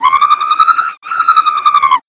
This is an Alien Scream effect I made by taking my Scream I recorded earlier and Reversing it adding a "wha wha" effect in Audacity and then I copied that, placed it after the first, and reversed it again.
Alien-Scream, Starting-Stopping, Werid, Scream, Scray, ALien, Distorted, Screaming, Odd